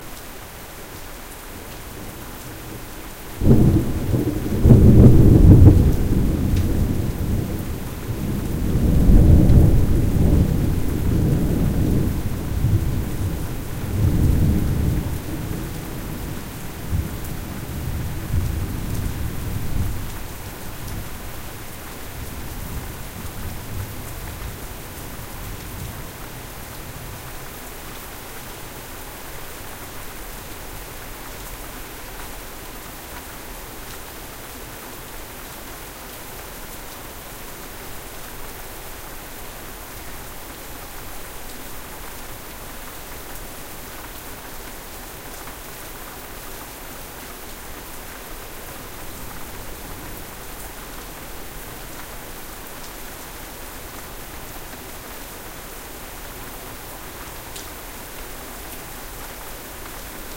rain thunder1
lightning, field-recording, storm, thunder-clap, thunderstorm, thunder, strike, thunder-storm, weather, thunder-roll, rain